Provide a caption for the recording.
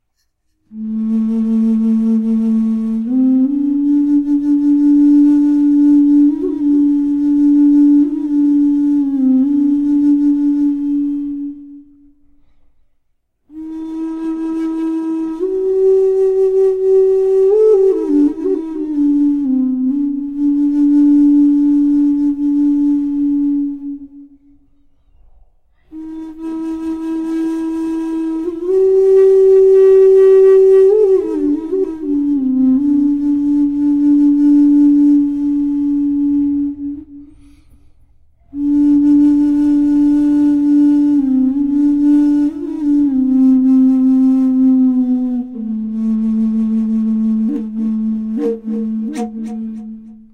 Native American Style A3 Bamboo Flute
I warm up with a few runs around one minute. I was working with my interface and laid this sample down. It is a Native American Style Flute made of bamboo with a fundamental note of A3. Thought I would stick it on here to give back a little.
Flute, A3, Low, Native, Bamboo